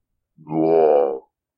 Zombie Walking 2
Sound of a walking zombie
Walk, Idle